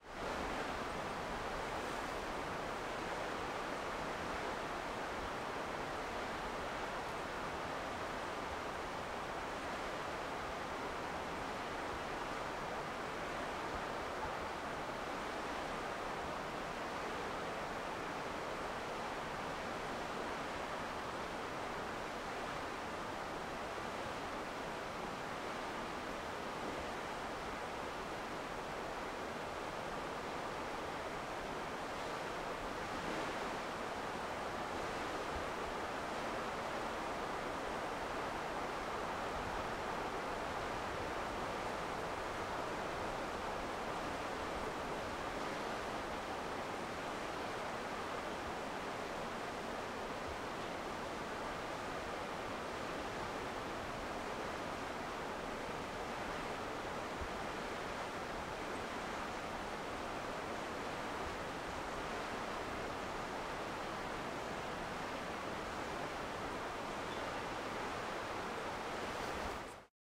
The forest at the edge of the ocean in West Vancouver. Recorded using an ME66.